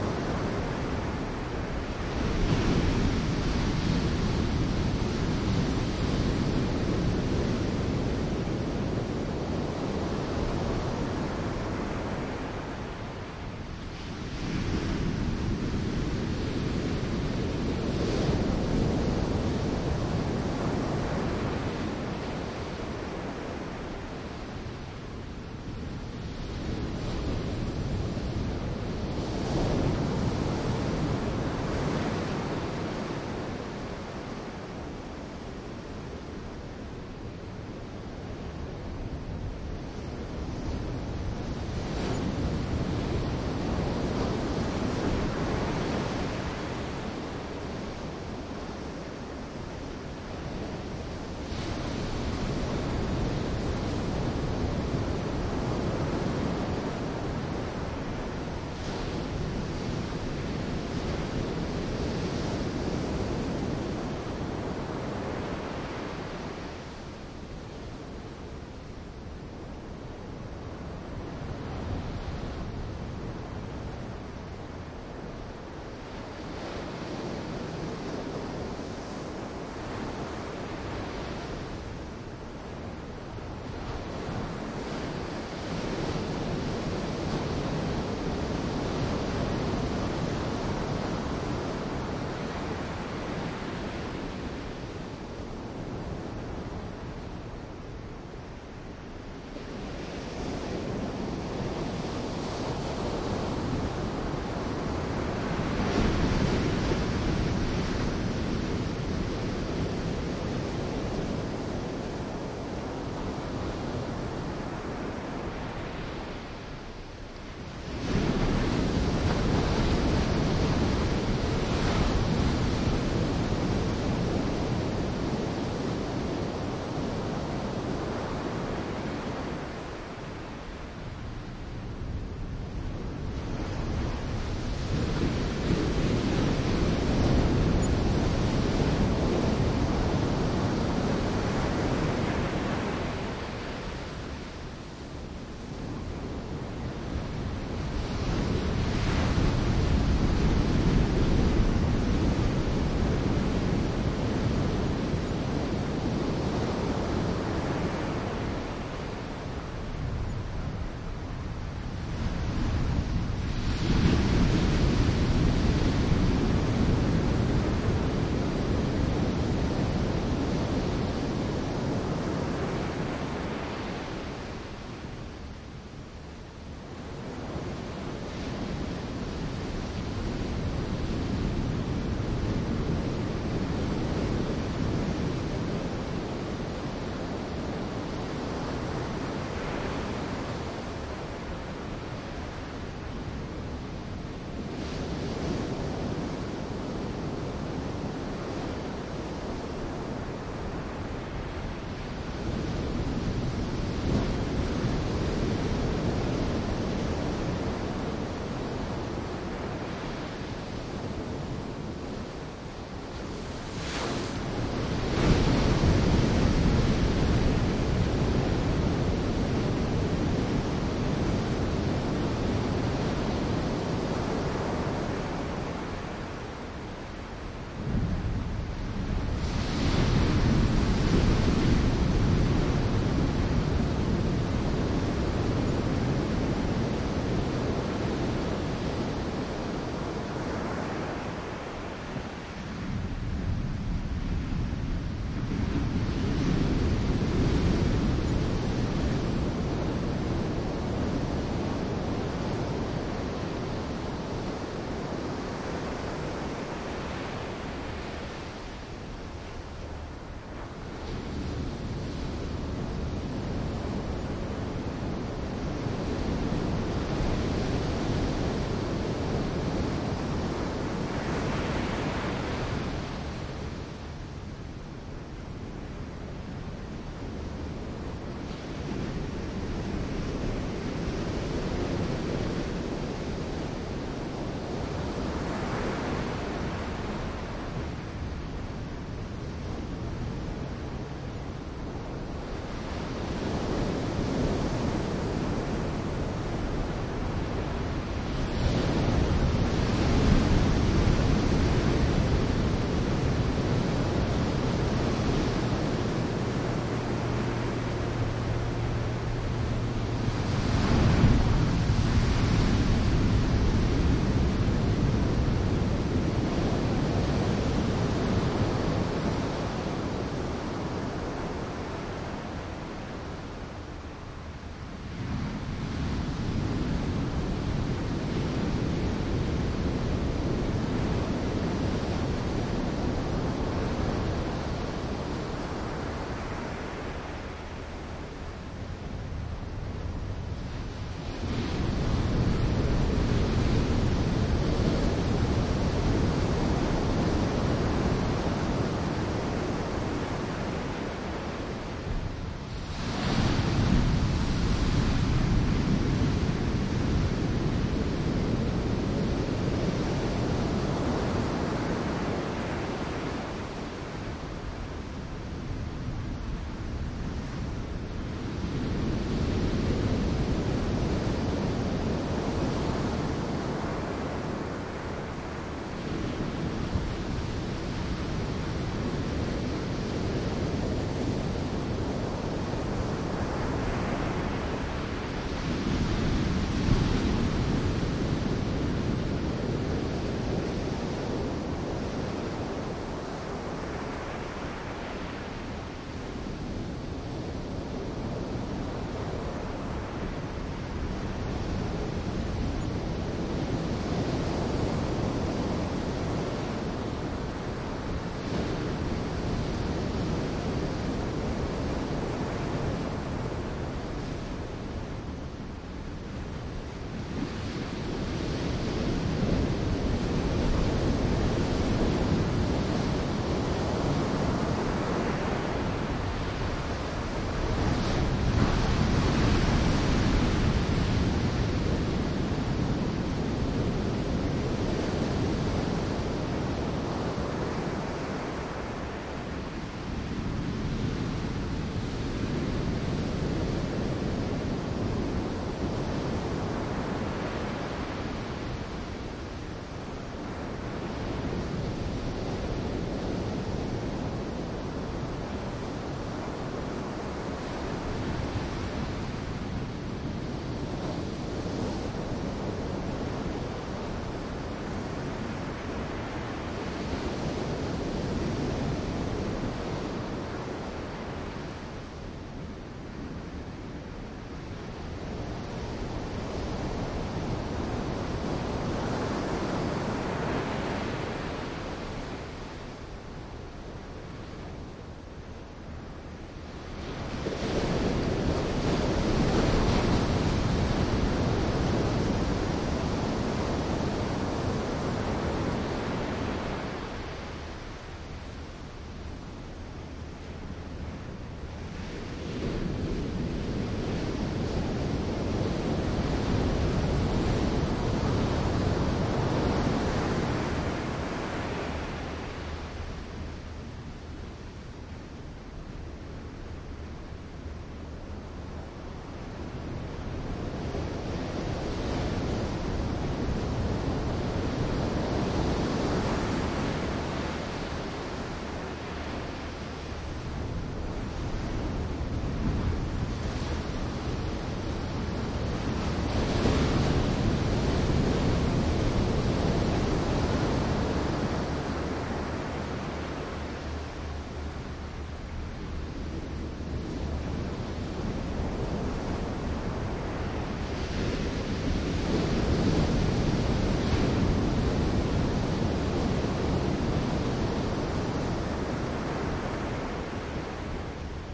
SoundField Ocean Take 4 b-format 161205
General beach ambience with waves on sand, no human noise, b-format ambisonic - recorded on 5 Dec 2016 at 1000 Steps Beach, CA, USA. - Recorded using this microphone & recorder: Soundfield ST350, Zoom F8 recorder; Format conversion and light editing done in ProTools.